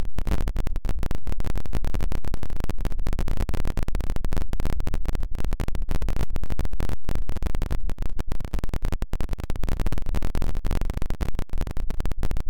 A glitchy electronic sound made from raw data in Audacity!
64, 8, 8-bit, 8bit, audacity, c64, computer, edited, wave